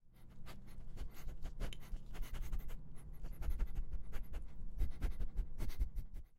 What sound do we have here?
Olfateo Ratón
little, smell, mouse